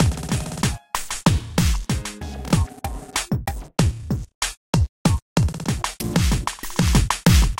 SIck BEats from The block -
Sliced and Processed breaks beats and sick rythms for IDM glitch and downtempo tracks Breakbeat and Electronica. Made with battery and a slicer and a load of vst's. Tempos from 90 - 185 BPM Totally Loopable! Break those rythms down girls! (and boys!) Oh I love the ACID jazZ and the DruNks. THey RuLe!